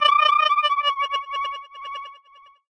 I made this sound in a freeware VSTI(called fauna), and applied a little reverb.
alien, animal, animals, creature, critter, space, synth, synthesized